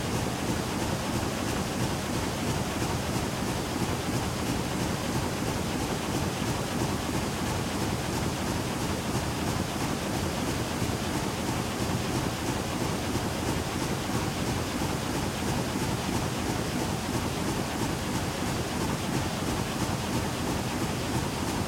cardboard factory machine-005
some noisy mechanical recordings made in a carboard factory. NTG3 into a SoundDevices 332 to a microtrack2.
engine, factory, industrial, loop, machine, machinery, mechanical, motor, robot